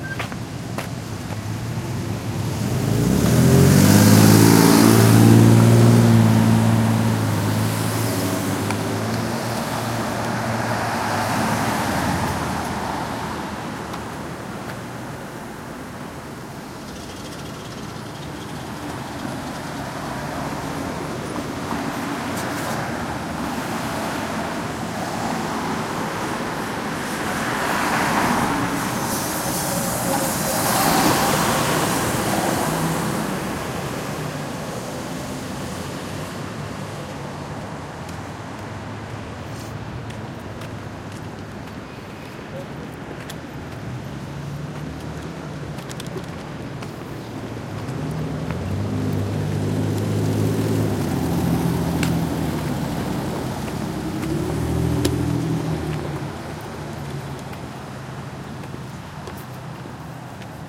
city street noise
A short walk through downtown Indianapolis at around 11:00 A.M.
traffic, ambiance, noise, street, urban, city